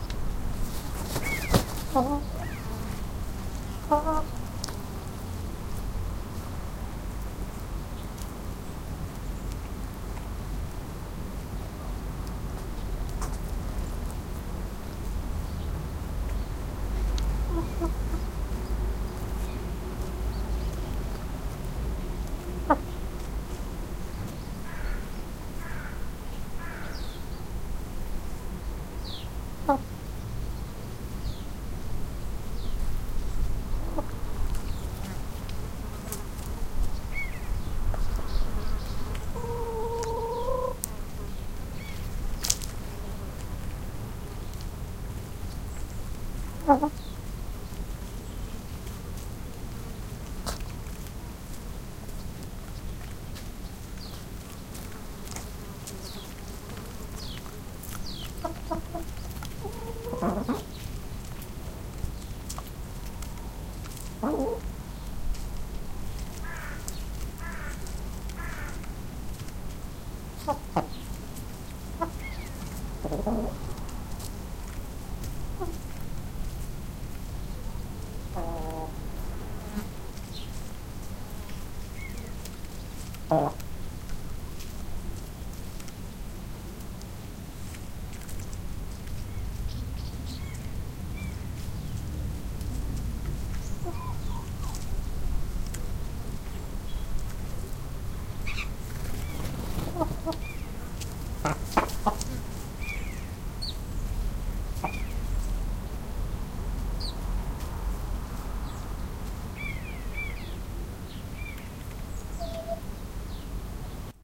chickens outside
Well, I wanted to do add a sound to my recording of a chicken in a barn. I put the mic (Zoom H2 - 4CH-around) outside on the ground and threw some corn around it, so that the chickens will walk around it and hopefully make some noise.
The chickens are very chilled, so there are just clucking smoothly and you can hear them walking around. Further there some flies flying close to the mic, some birds and stuff ... :-)
clucking, outdoor, cackle